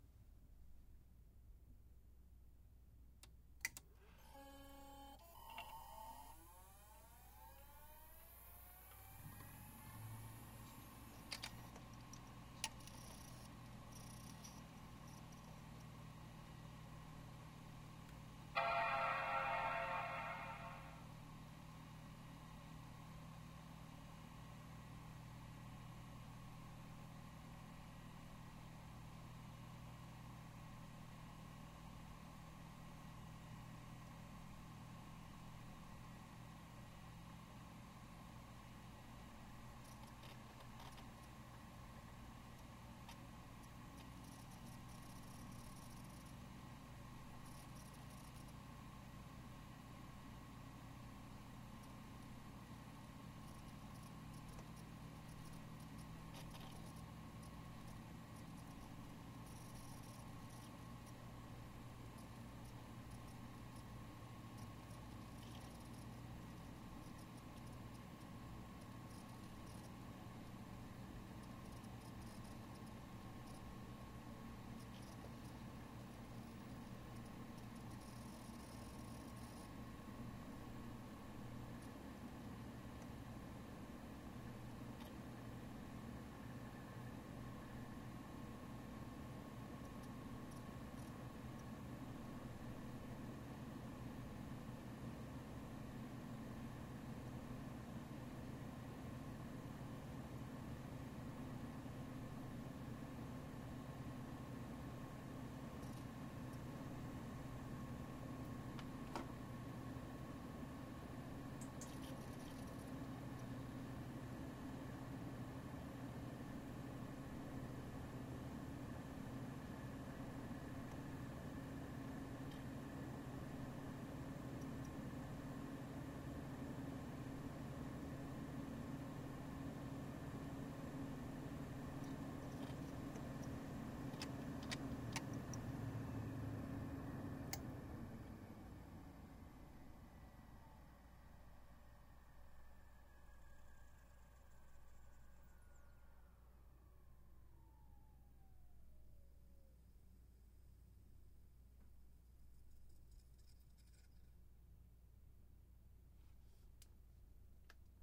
MacProPowerCycle(adj.Lvels)
Recording of a first gen Mac tower boot cycle, and shut down.
Boot, computer, mac, Tower